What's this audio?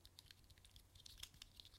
Handling USB Key 2
USB, Laptop, H1, Key, Computer, Drive, Pen, Zoom, Recording, Macbook, Stick, Stereo